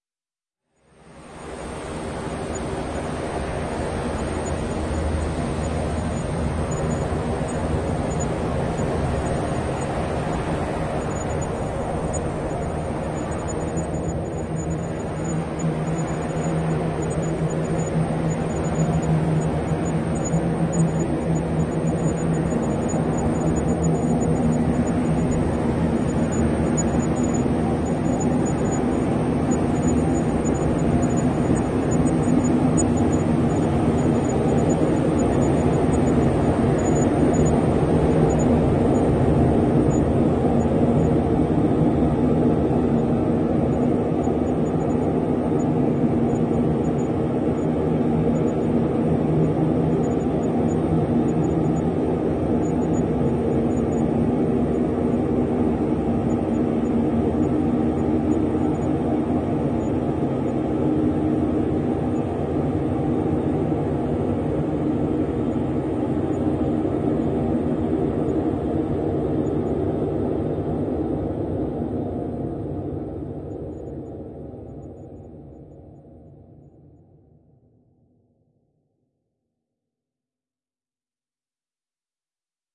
LAYERS 003 - Helicopter View - D#2
LAYERS 003 - Helicopter View is an extensive multisample package containing 73 samples covering C0 till C6. The key name is included in the sample name. The sound of Helicopter View is all in the name: an alien outer space helicopter flying over soundscape spreading granular particles all over the place. It was created using Kontakt 3 within Cubase and a lot of convolution.